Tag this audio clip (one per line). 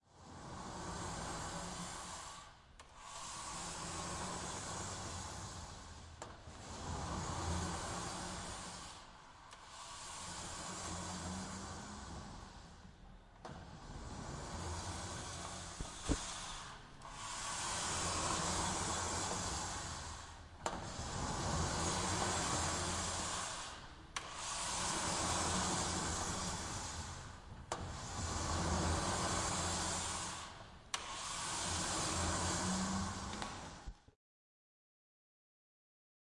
Pansk
Panska
CZ
Czech